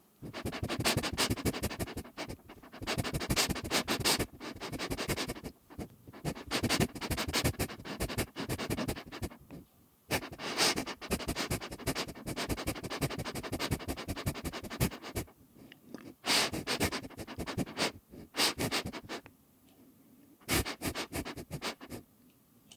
Dog - Snif - Sniffing - Animal - Breathing - Search
Animal, Breath, Breathing, Dog, Dogs, Search, Searching, Snif, Sniffing